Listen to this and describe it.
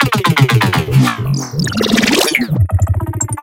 glitch grains midbass 2
circuit-bent, midbass, riddim, glitch, sfx, circuits